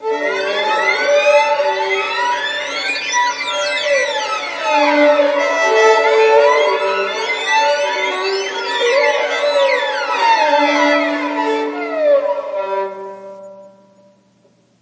Violins Suspense 3
4 Violins playing harmonics, which they are part of the Harmonic series. Up and Down Glissandos while making these strange noises.
I did this by recording violin parts and stack them together, one on top of another in Audacity, then added reverb, and normalized.
This sound can be use for any kind of scary movie, scene, etc.